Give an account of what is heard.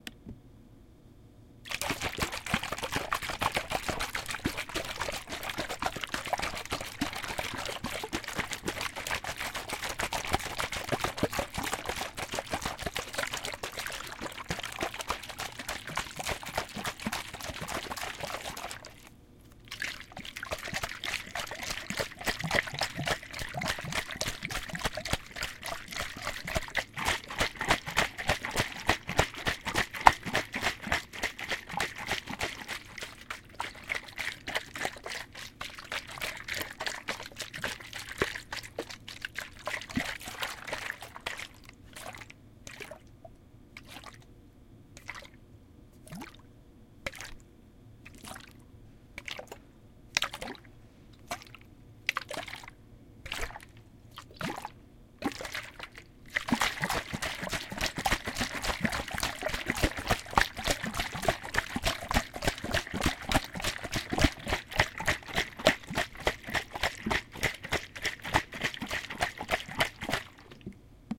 Recording of water movement through a water bottle being held over the recorder and shaken. Recored on Zoom H2.